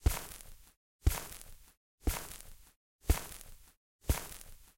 Planting Sounds
Varios sounds for planting seeds. Made for a cancelled student game.